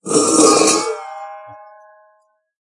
Metal scrape on concrete
Moving a metal dog bowl on rough concrete, Recorded with an iPhone 6, processed in Audacity